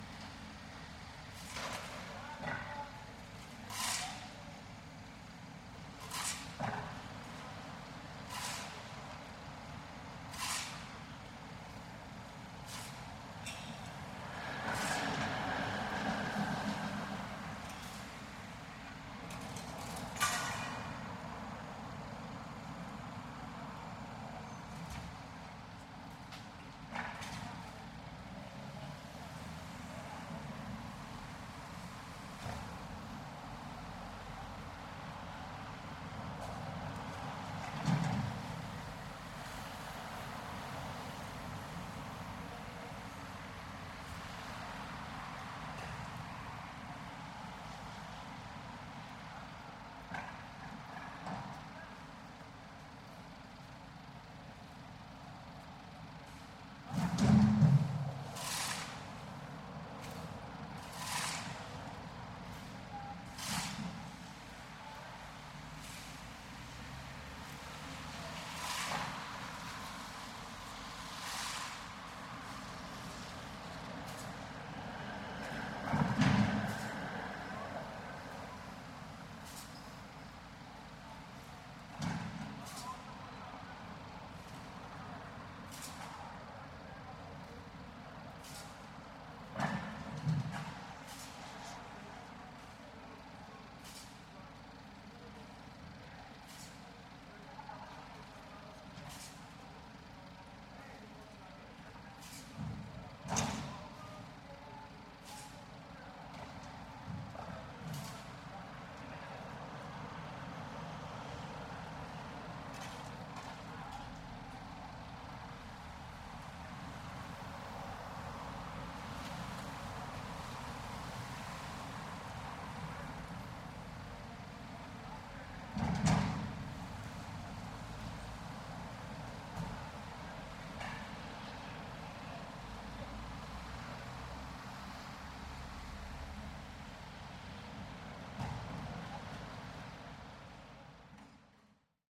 City Street Construction
Cars, heavy trucks, trams and occasional worker yelling.
time cca 2:30
microphone LCT540
Cars, Cinematic, City, Construction, Film, Public, Road, Street, Traffic, Trains, Transport